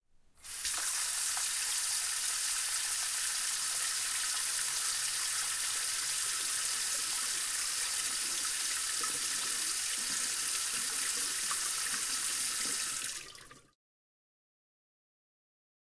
water running from a tap - longer version